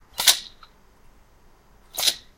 printing unit 2
Sound of a printing unit of a screen printing machine
pneumatic, industrial, screen-printing, printing-unit